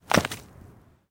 impact-stone-heavy
Throwing heavy stone on ground
field-recording, stone, impact, heavy